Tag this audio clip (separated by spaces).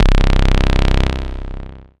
basic-waveform multisample reaktor saw